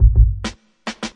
Roots onedrop Jungle Reggae Rasta